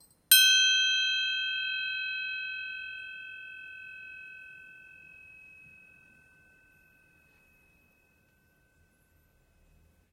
A single beat of a bell.
See also in the package
Mic: Blue Yeti Pro
bell, ring, ship-bell